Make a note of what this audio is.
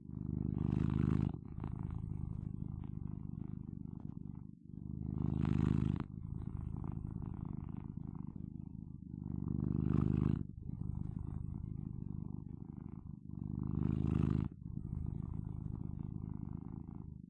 SND CatPurring Loop 02
Cat purring, already looped.
[Oliver]
animal, feline, kitten, pets, purr